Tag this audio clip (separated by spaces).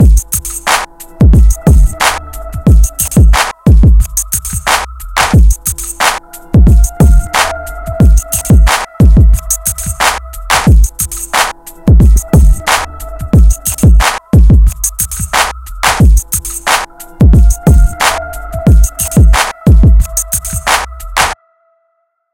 130-bpm beats drum-loop drums percussion-loop